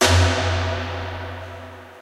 Neil Huxtable playing drums. edited by Tom (pumping up fundamental of each pitch) as an experiment - never going back to do the full chromatic set with full decay. there is an abrupt end to these samples - you might want to play around with your sampler's ADSR envelope. enjoy!